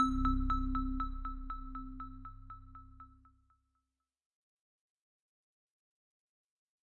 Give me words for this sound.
An sound effect intended to be used when a player picks up an item in a video game. I call it "inorganic" because unlike my other "item sound effects" it doesn't realistically sound like someone picking up an item. Made with Ableton.